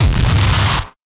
GNP Bass Drum - Powernoise Headache
A kick drum ran through a Digitech guitar multi-fx unit. Great for powernoise.